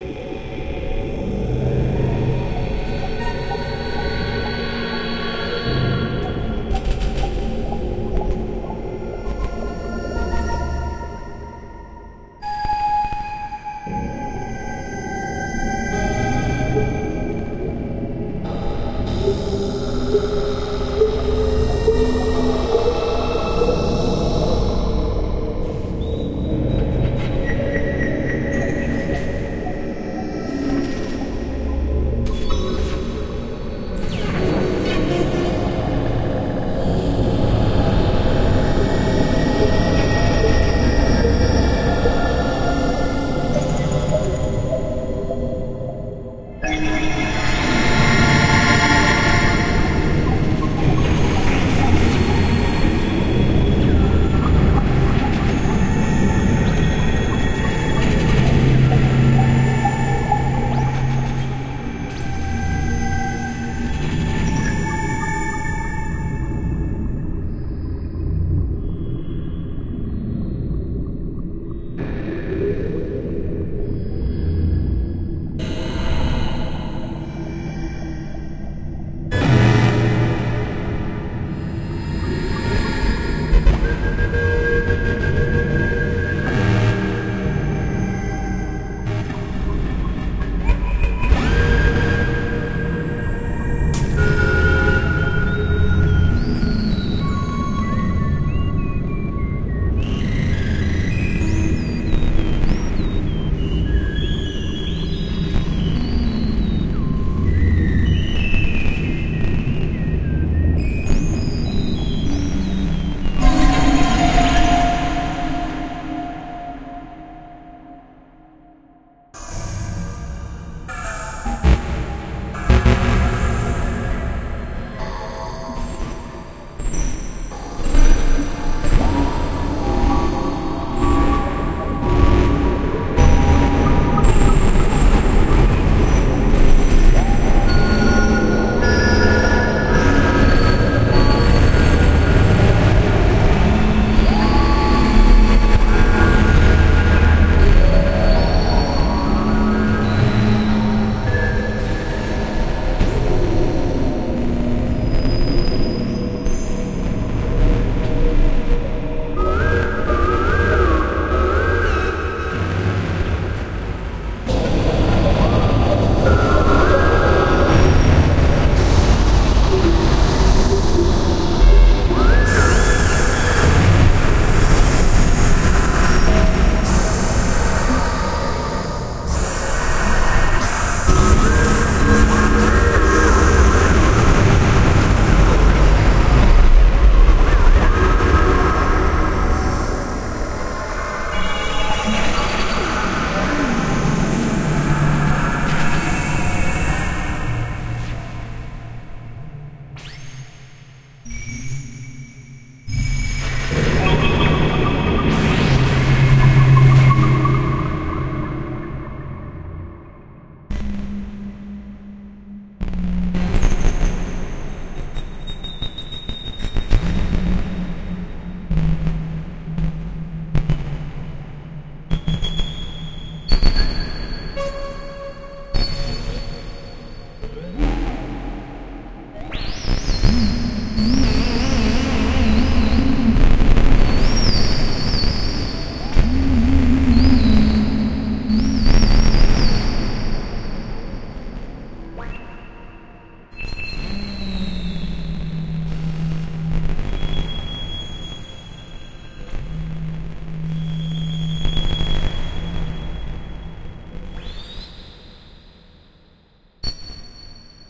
So this is an alien sci-fi ambient soundtrack that i made, it is very mysterious and spooky, reminds me of the game lost planet or the movie ALIEN. just like somewhere in an abandoned space jail station lost in space.
i compose ambient for indie movies and electronic music.